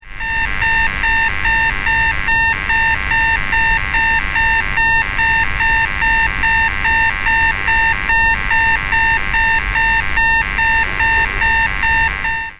This is a recording of my braun electric toothbrush alarm. When turned off after using it gives a very quite alarm to warn you that it needs charging. Recorded on my ipod touch 3G with blue mikey microphone and FiRe app. Please note this particular alarm is very quite - hence there is a bit of white noise in the recording. However it makes an interesting alarm with strange buzzy bits in between the beeps.
alarm, toothbrush, low-battery, braun